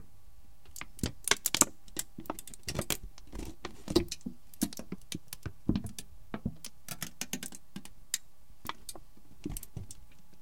Papel de aluminio arrugado 01
Moving a wrinkled sheet of aluminium paper. // Moviendo una hoja de papel de aluminio arrugado.
aluminium, metalic, aluminium-film, noise